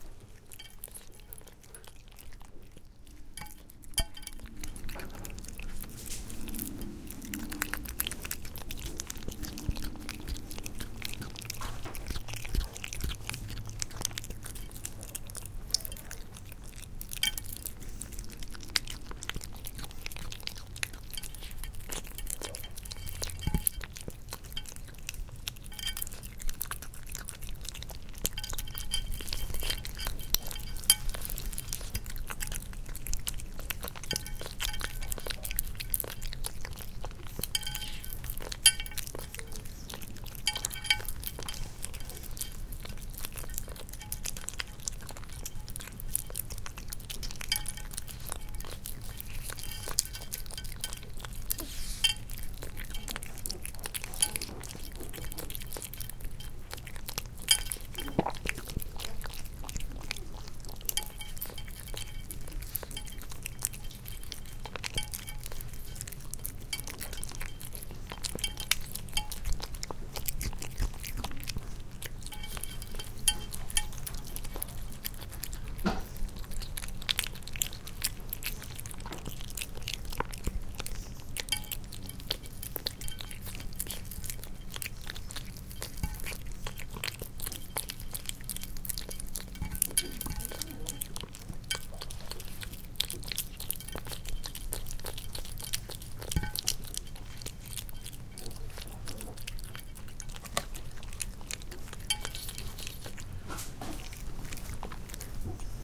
01 cat eating - close 1:14:2008
Cats love to eat food. Recorded with the built-ins on a Sony D50, close in.
catfood, licking, cat